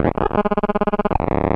modular love 12
A noisy glitch-type sound made from a sample and hold circuit modulating at audio frequencies. Created with a Nord Modular synthesizer.
beep, click, digital, glitch, noise